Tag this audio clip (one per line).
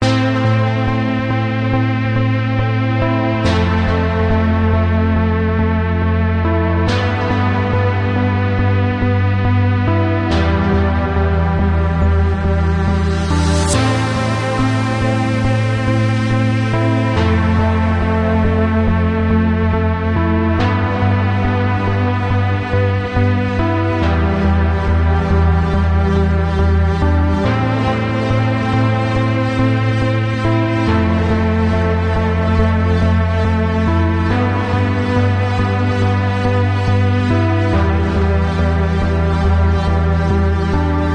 music
synth
cinematic
bittersweet
electronic